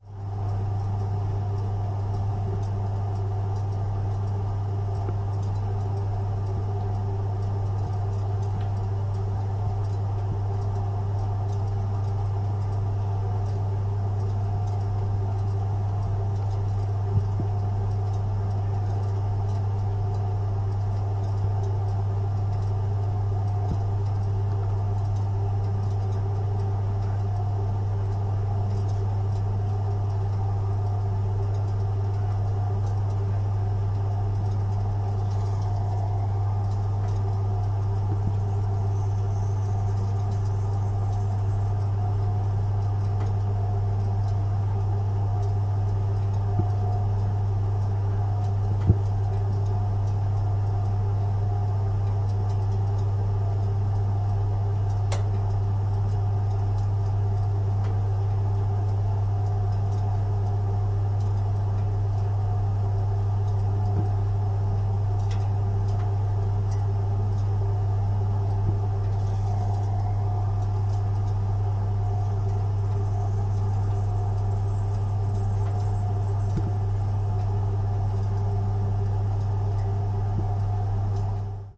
this is the sound of a boiler taken from the side of the boiler with a Tascam DR-08